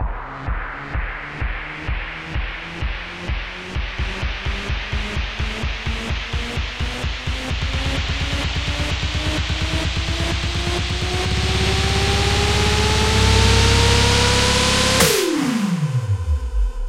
Tempo - 128bpm
Build up
Created in FLStudio12
rising, up